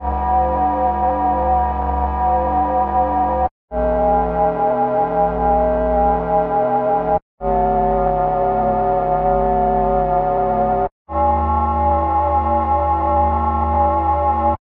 HORROR SOUND
I created sound in TAL-Ele7ro-II (VSTi)
Please, tell me where used my sound.
creepy, drama, effect, fear, film, game, horror, movie, scare, scary, sinister, sound, synth